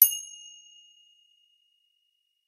finger cymbals side01
This pack contains sound samples of finger cymbals. Included are hits and chokes when crashed together as well as when hit together from the edges. There are also some effects.
bell, chime, cymbal, ding, finger-cymbals, orchestral, percussion